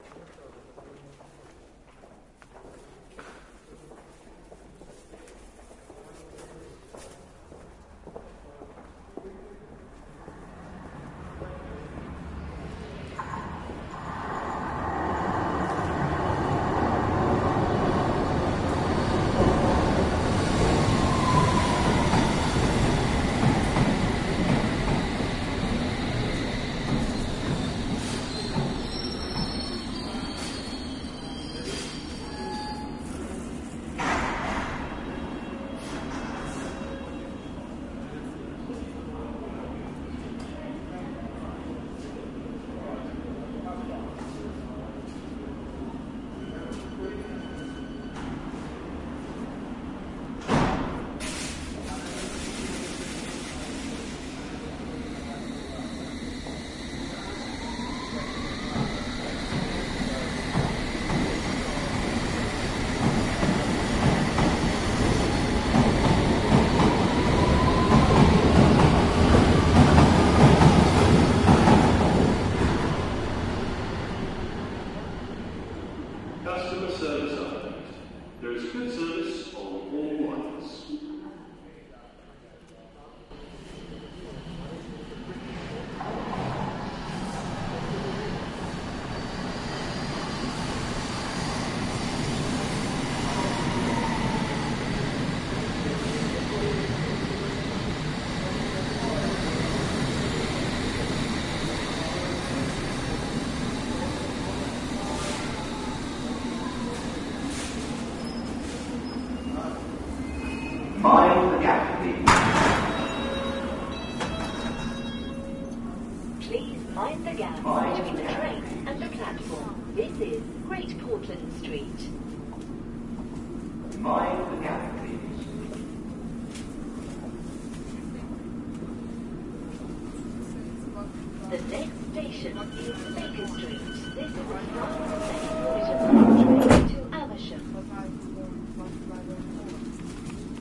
London Under Ground Train
The sound of two trains arriving. One train i ger on the sound of the announcer and the train pulling off.